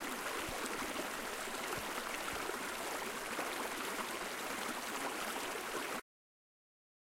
Sonido 2 (Original) Calma
calm, relaxing, river, water